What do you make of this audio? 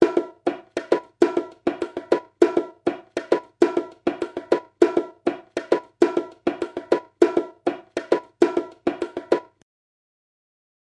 JV bongo loops for ya 2!
Some natural room ambiance miking, some Lo-fi bongos, dynamic or condenser mics, all for your enjoyment and working pleasure.